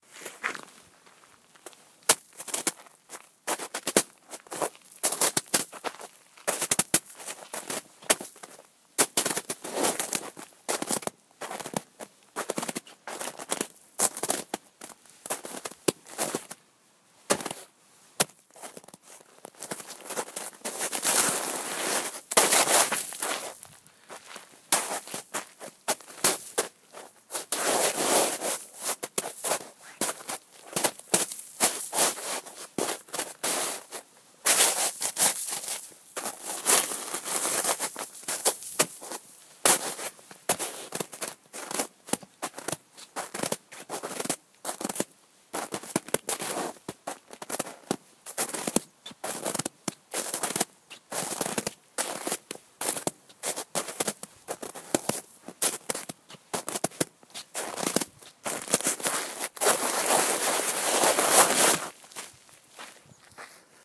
Snow and shovel
Different snow sounds, footsteps shovel and more
cold, footsteps-in-snow, shovel, snow, snow-sounds, winter